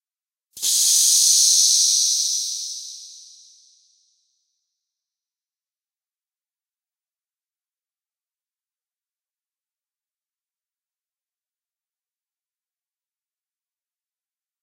door future open
Not quite Star Trek, but something of what an electronic futuristic door might sound like.